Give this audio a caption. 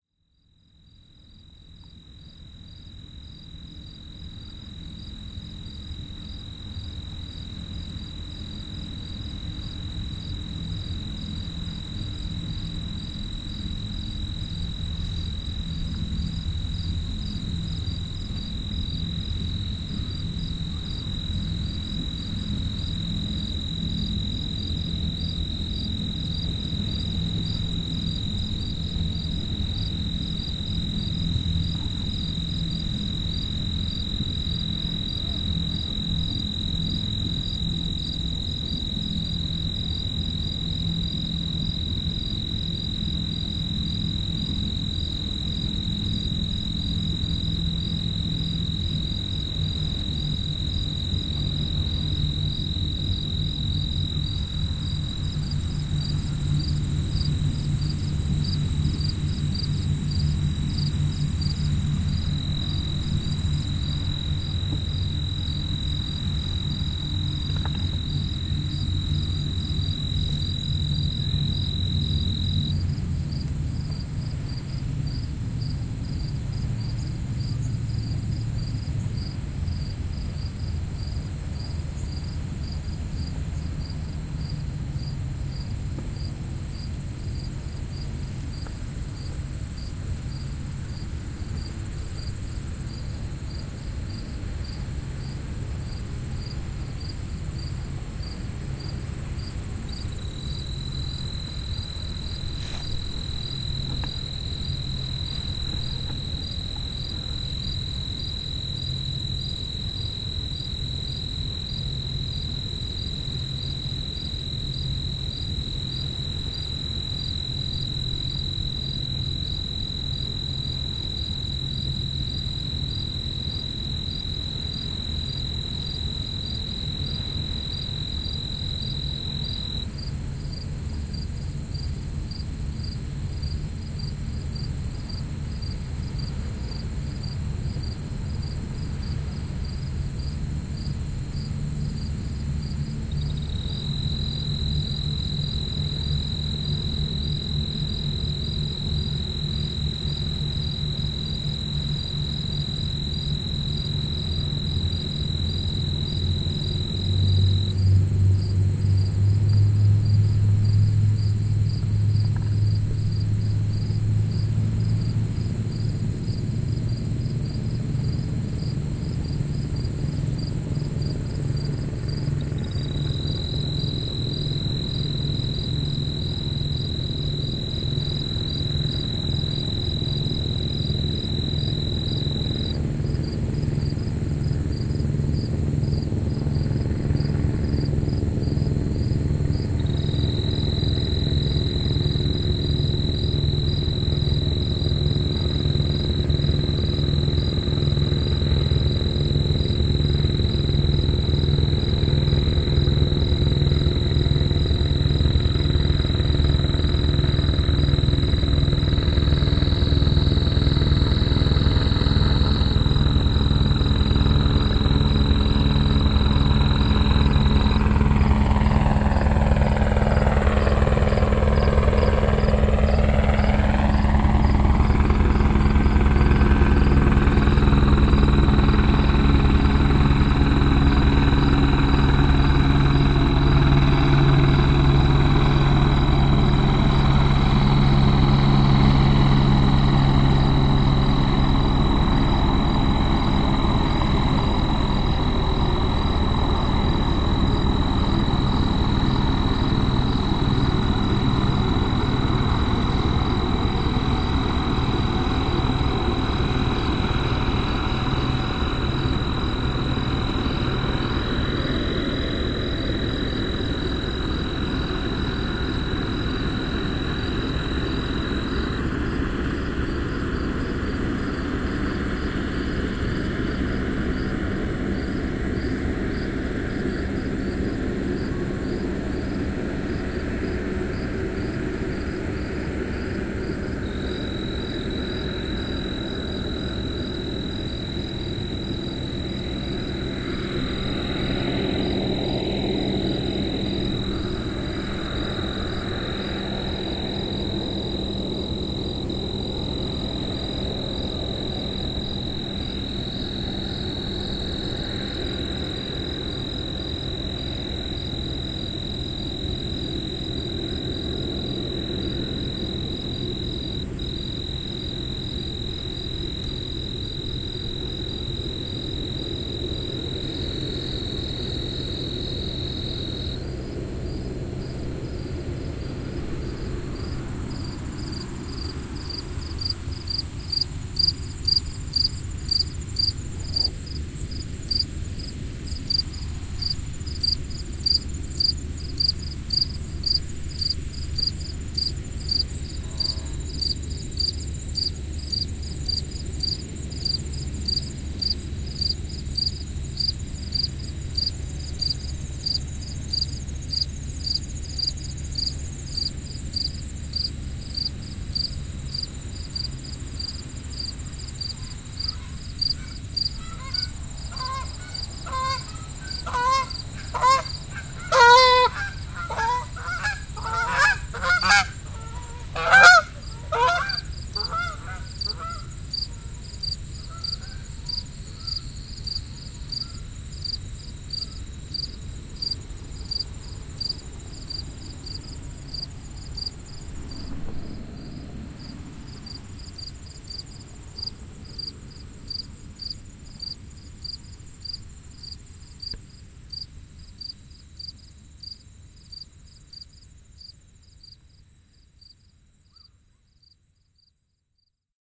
soundscapes at hammerklavier's neighborhood